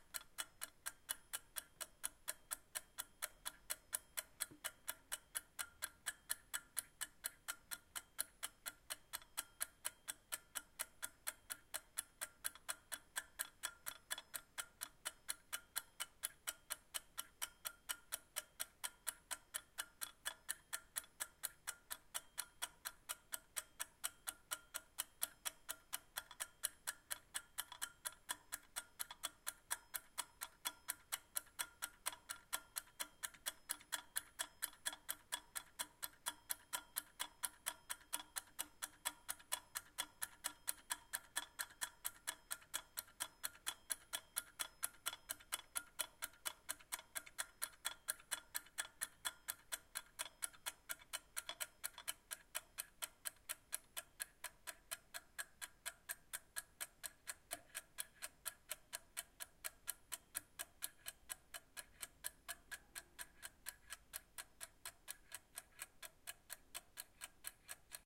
Kitchen Egg Timer
This recording is from a range of SFX I recorded for a piece of music I composed using only stuff that I found in my kitchen.
Recorded using a Roland R-26 portable recorder.
Cooking, Foley, Home, House, Household, Indoors, Kitchen, Percussion